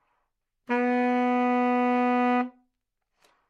Part of the Good-sounds dataset of monophonic instrumental sounds.
instrument::sax_baritone
note::D
octave::2
midi note::26
good-sounds-id::5297